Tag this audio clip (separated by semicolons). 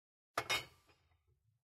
bottle; bottles; drink; glass; glasses; wine